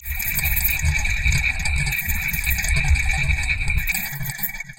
Enigmatic Loop 2
A mix of a different loop percussions and played by a percussionist.
Assemblage de plusieurs boucles de percussions avec des rythmes et tempos differents. Une demi douzaine de sons empilés ou plus...Quelques effets de phasing wah wah flanger et autres pigments sonores.
alien, bing, cyclothymic, effect, memory, noise, percussion, phaser, space, Speak, Speech, Spock, wah